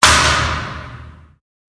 VSH-47-plastic-crack-bright-slam-long
Plastic foley performed with hands. Part of my ‘various hits’ pack - foley on concrete, metal pipes, and plastic surfaced objects in a 10 story stairwell. Recorded on iPhone. Added fades, EQ’s and compression for easy integration.
kick, crack, squeak, pop, human, slap, slip, thump, hits, fist, smack, hit, slam, sweep, hand, plastic, knuckle